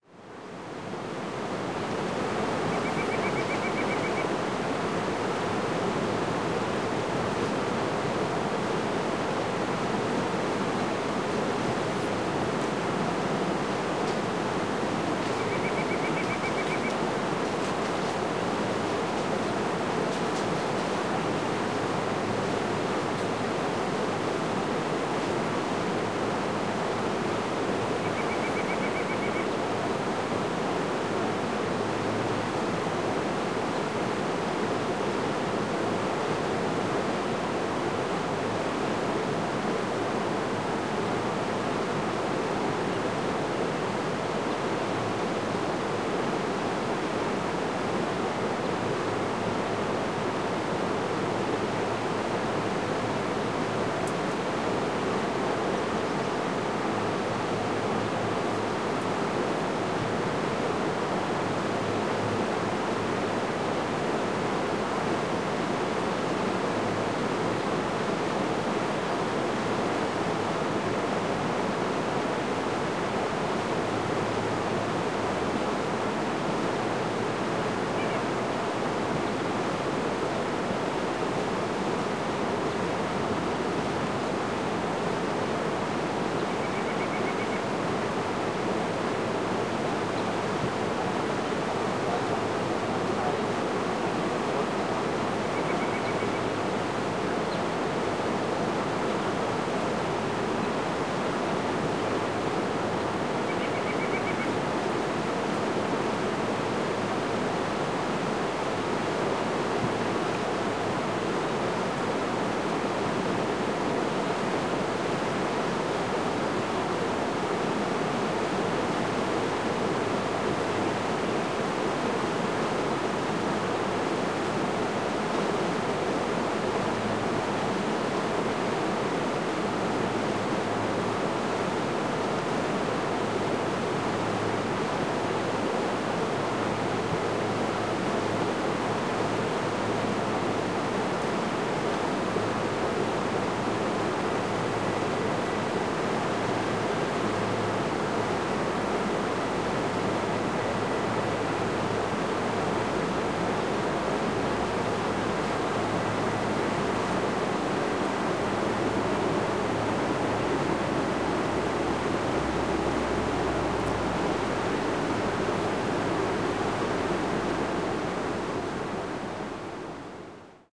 waterfall and birds chirping
The sound of a rushing waterfall with birds chirping in the background
waterfall, nature, ambient, stream, water, ambience, birds, field-recording, river, rapids